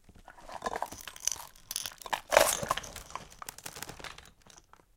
Tumbling Sewing Basket
The parts inside a wicker sewing basket tumbling close to the mic.